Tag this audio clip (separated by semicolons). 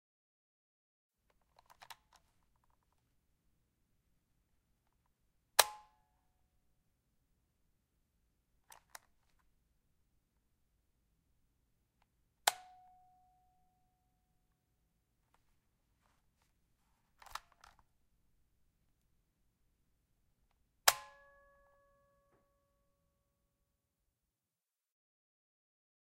Tape; Cassette; player; foley; Button